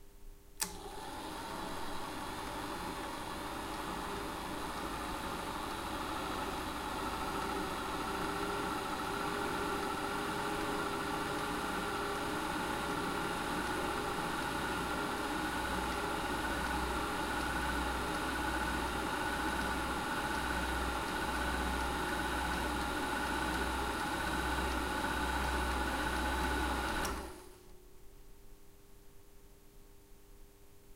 Super 8 mm projector start no film
Sound recording of a real super8 mm projector starting, turning it on but without film in it
silent-film, super8, 8mm, film, hand, s8, rhythm, reel, load, movie, install, project, vintage, projecting, cinema